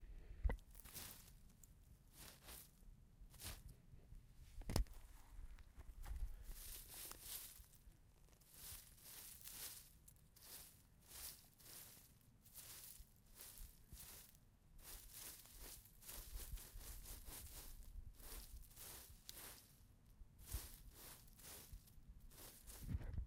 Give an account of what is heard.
Soil Brush
Brushing the ground with my hand, recorded with a Zoom H1.